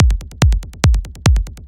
kickdrum psytrance kick delay loop drum trance bass beat techno